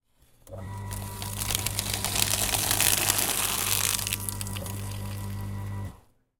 A sheet of paper being shredded by an automatic office-style crosscut paper shredder. This is the kind of shredder that has a sensor that starts it up as you insert the paper.
Recorded with Zoom H4n from about eight inches away
crosscut, motor